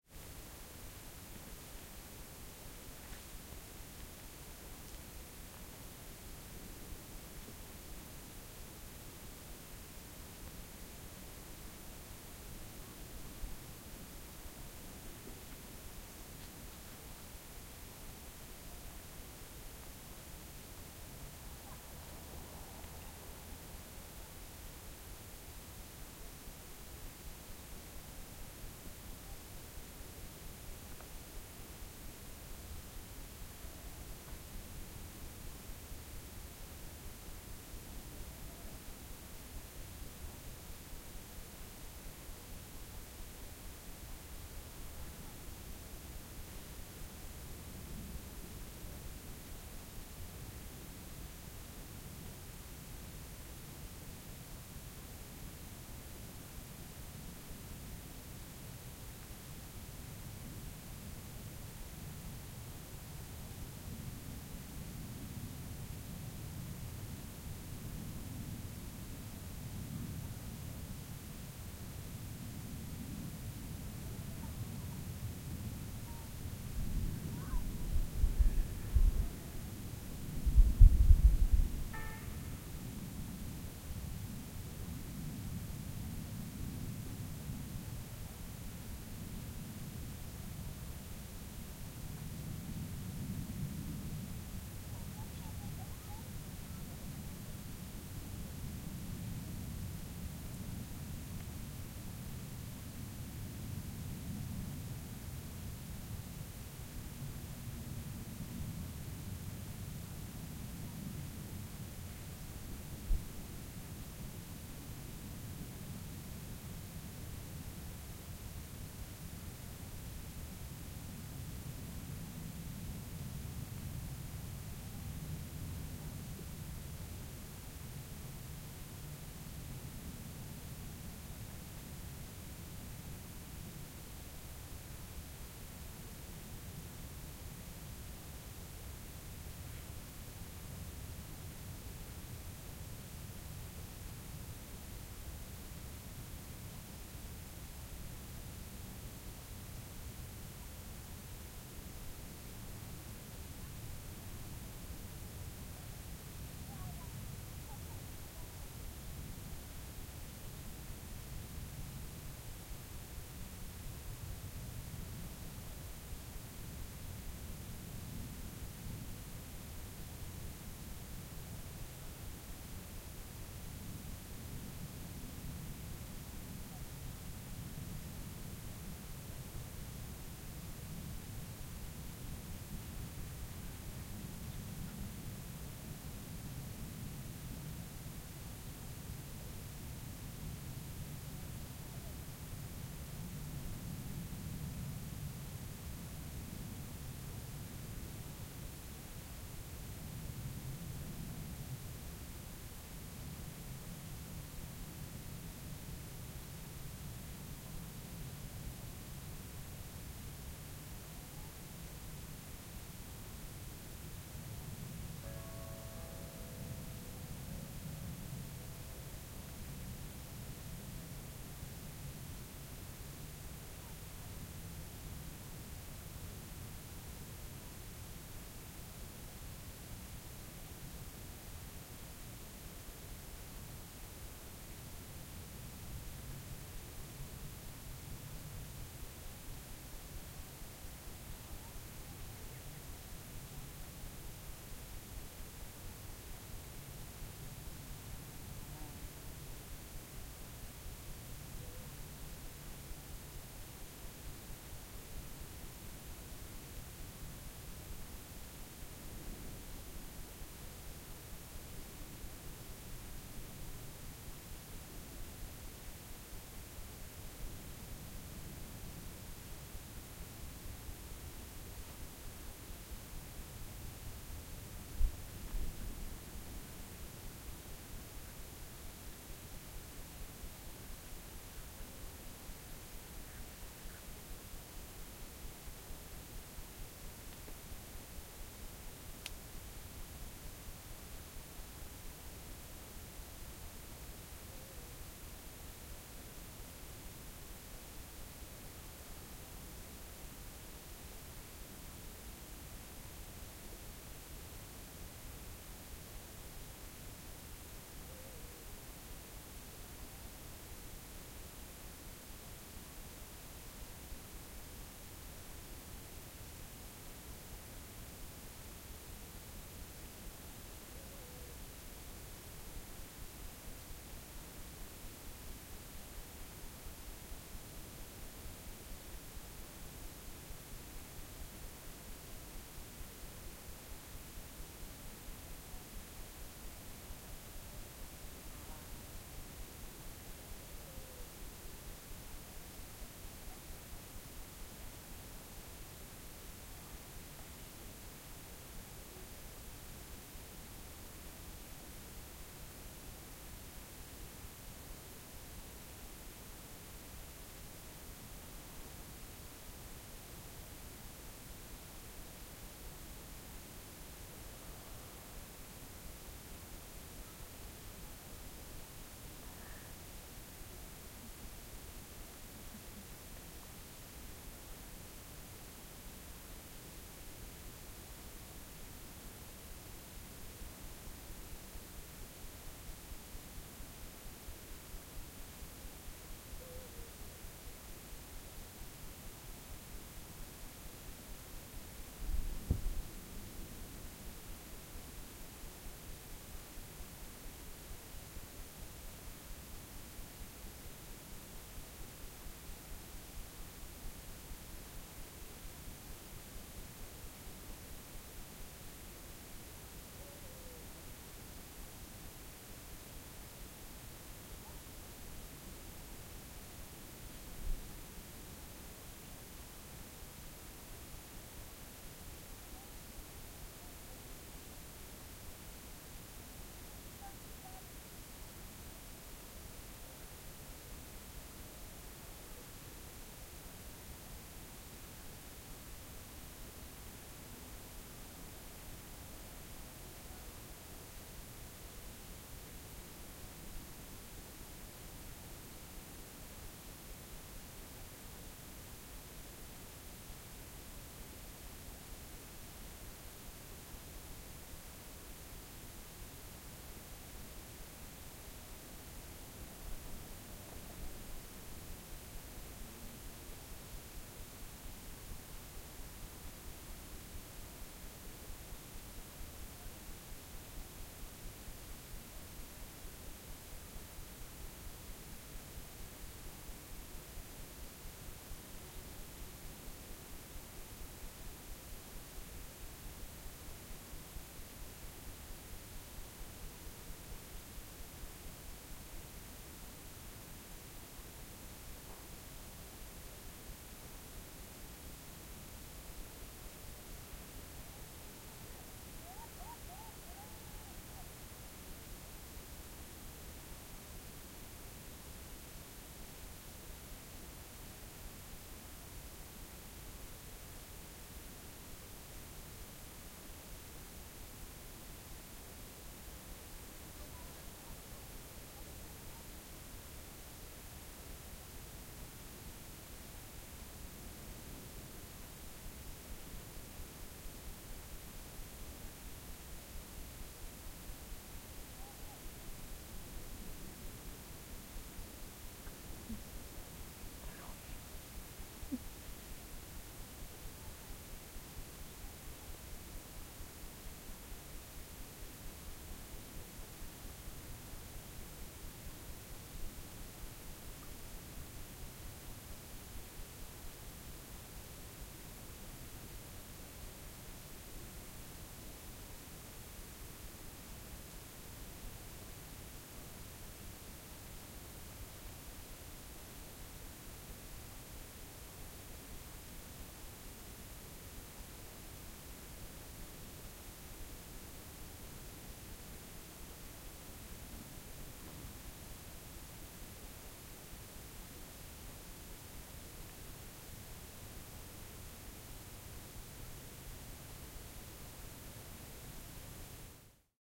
Ambience - dawn atmosphere - air - light wind - south France - provence - far village - no insects
Quiet evening atmosphere recorded in the mountains in south of France.
Some wind a bell of a village nearby.
ambiance, dawn, evening, field-recording, mountain, soundscape, village, white-noise, wind